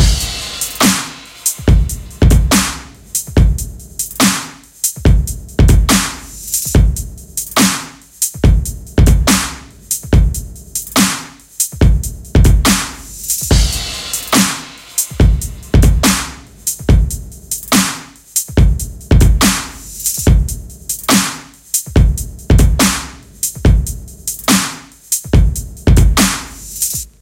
Dubstep Drumloop 142 BPM
Basic Dubstep Drumloop by Lord Lokus
Drumloop done with FL Studio 12
Oneshot Samples in Pianoroll
Mix & Mastered with Compressor, EQ, Limiter
Drums, Dubstep, Kick